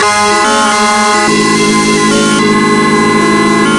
circuitbent Yamaha PSR-12 loop5
circuit, yamaha, psr-12, sample, bent